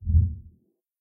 Blip pop button with mid freq